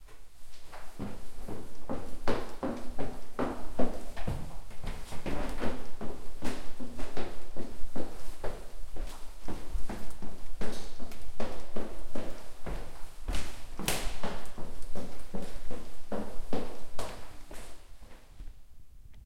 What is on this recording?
Walking up the stairs of a student apartment block, fairly quickly but not running. Bournemouth, UK